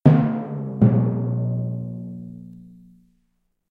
Failure Drum Sound Effect 3
A simple "failure" sound using a two timpani drum with a pitch change on a music-making program called Finale. Enjoy!
video-game, humorous, sound, cartoon, drum, funny, negative, error, failure, game-over, fail, wrong, mistake